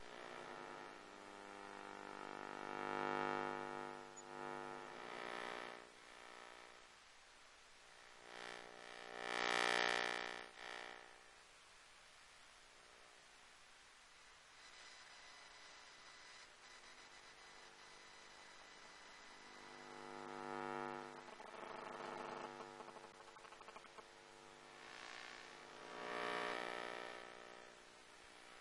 [Elektrosluch] LCD Screen
Electromagnetic field recording of a LCD screen (and some cellphone interference) using a homemade Elektrosluch and a Yulass portable audio recorder.
8bit, electromagnetic-field, elektrosluch, LCD